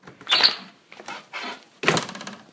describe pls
A stiff wind could take this door off its hinges.